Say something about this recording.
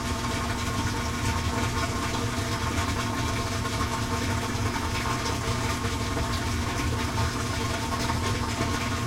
washing machine rinse cycle 3
During the rinse cycle.
machine, washing, cycle, industrial